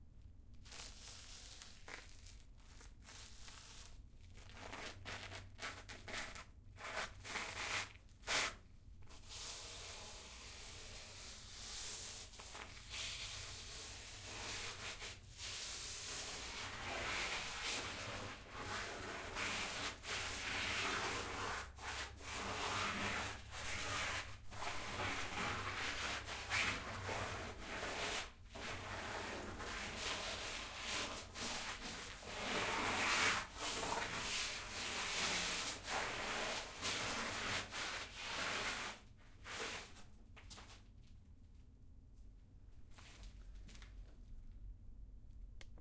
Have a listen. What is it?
foot dirt tunnell
Footsteps recorded insides a dirty tunnel inside of Castillo SanCristobal in San Juan, Porto Rico.
gravel, dirt, footstep, puertorico, tunnel